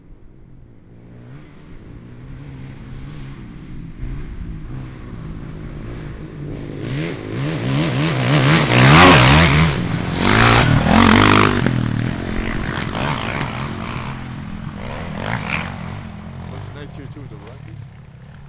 motorcycle dirt bike motocross pass by fast
fast, bike, dirt, motorcycle, pass, motocross